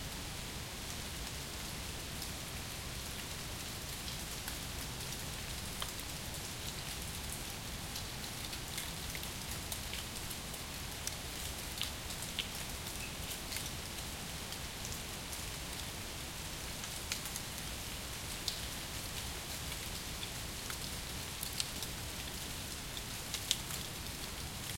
Autumn forest - leaves falling near pond I (loopable)
Leaves falling in a forest near a pond. Recorded in October 2017 in a German forest using a Zoom H2n. Loops seemlessly.
wind, atmo, loop